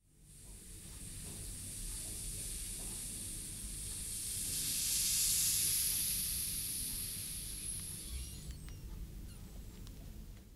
Sound of the air fading away from a schygmomanometer, recorded in a University's infermery. We also can hear the clock of the infirmery ticking.
I cut the whole schygmomanometer sound in half and this is the one with the air fading away only. (if you want the first half, which is the sound of the pump, please check TASPINAR_Zeyneb_2013_2014_part1.)
Durée: 10,561 secondes
Effect : Amplification + 10,4 dB / fade-in and fade-out with Audacity.
-Typologie-
Son continu complexe accompagné d'un léger son en itération tonique en arrière plan.
Peut s'apparenter à une fuite d'air, de gaz, d'un ballon qui dégonfle voire même à un sablier. ( typologie de P. Shaeffer )
-Morphologie-
Masse : bruit
Timbre harmonique : doux
Grain : lisse
Allure : pas de vibrato
Dynamique : attaque douce
Profil mélodique : serpentine
Calibre : amplification et fondus.